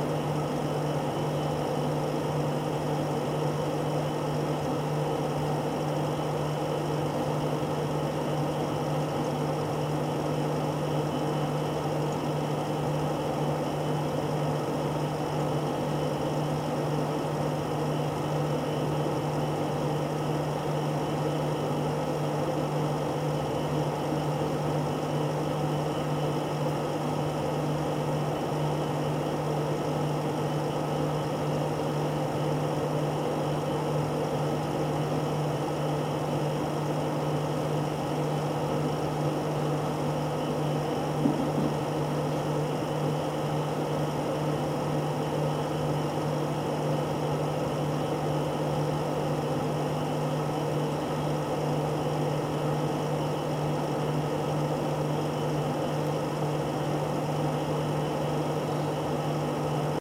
Tascam DR-05. Recorded by me.